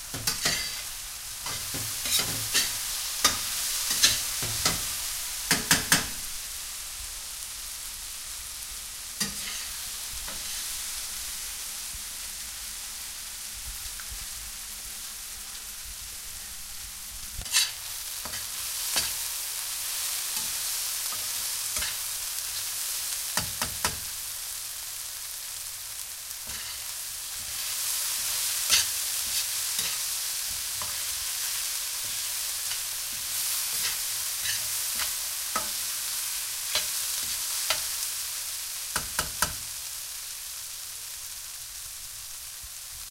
Frying onion
Frying large amount of the onion.
cook, fry, oil, onion